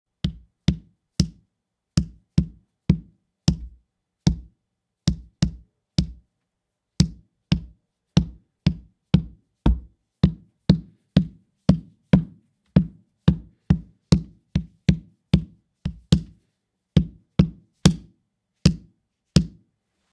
Tapping a mini-mag flashlight on a soft floor multiple times, with microphone about 10 inches away.